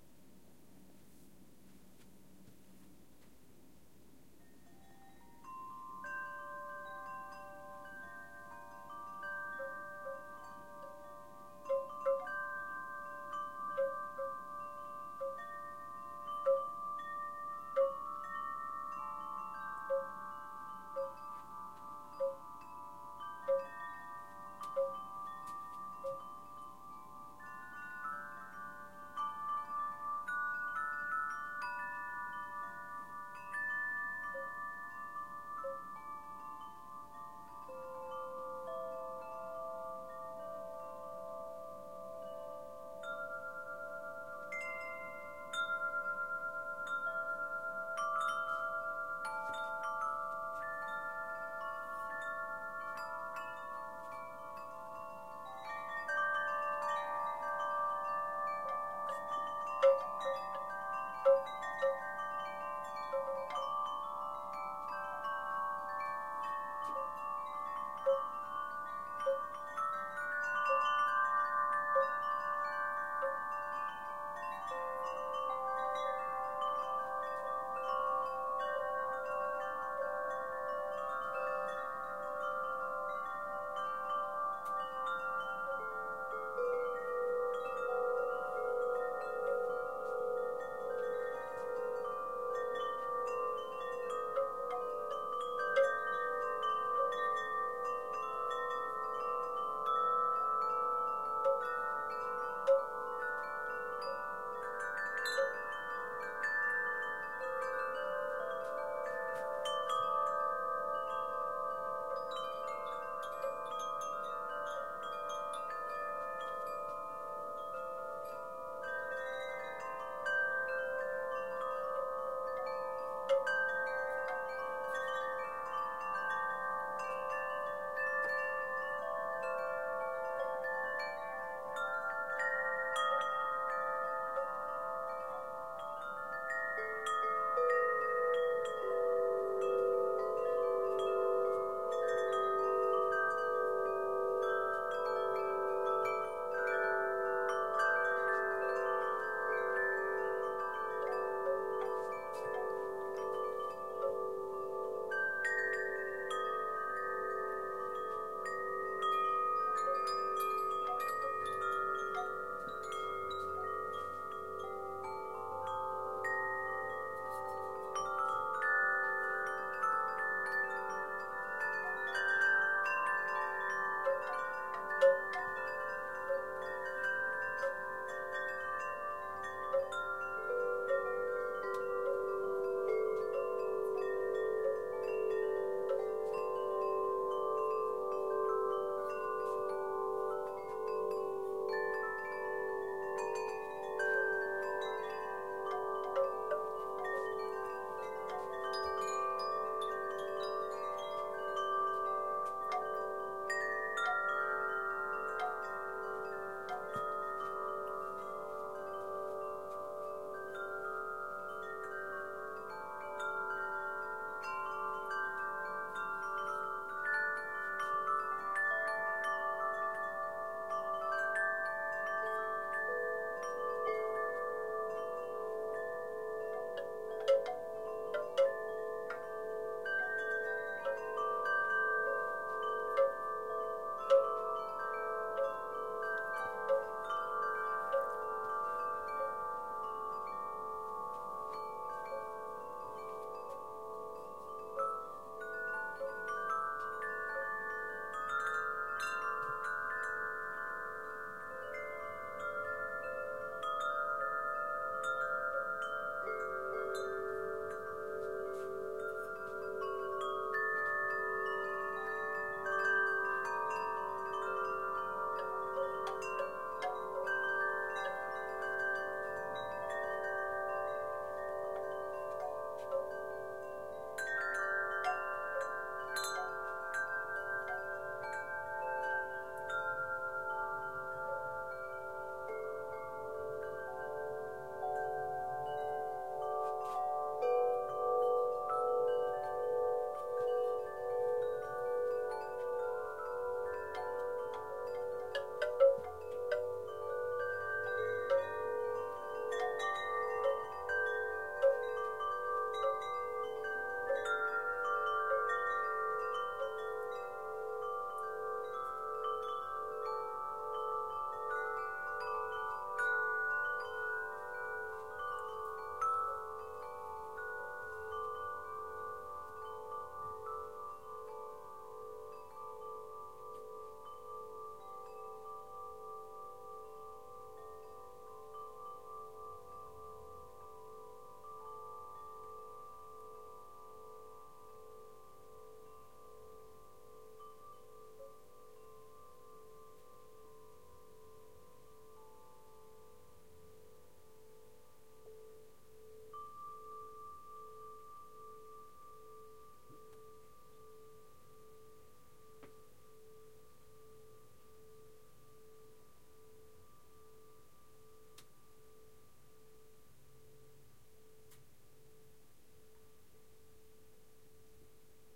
7 sets of wind chimes, one of bamboo & 6 metal of varying tones & sizes from 18” to 72”, played manually in studio, single track, to simulate a brisk summer breeze on the back deck. Recorded on Zoom H4, centered, at 6-feet, in Soundwell Studio, Boise Idaho USA.
atmosphere, ambient, relaxing, soundscape, wind-chimes, ambience, studio-recording, meditative, white-noise